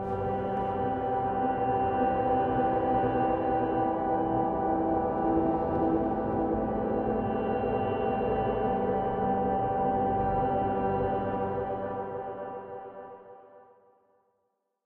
Piano drone
A really haunting drone. I recorded a few chords on a old, out of tune piano with a room mic, then processed it with heavy delay and reverb effects.
ambient, drone, piano, post, rock